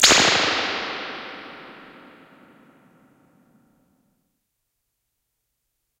pulse responses from great british spring reverb processed with equalizers, tube preamps and compressed with analog tape